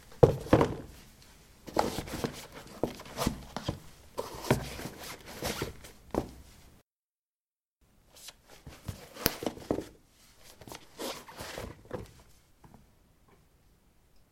ceramic 15d darkshoes onoff
Putting dark shoes on/off on ceramic tiles. Recorded with a ZOOM H2 in a bathroom of a house, normalized with Audacity.
steps, footsteps